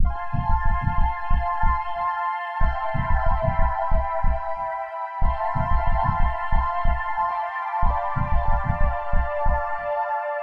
Nice infinity sound ...
ambient, pad, soundscape, space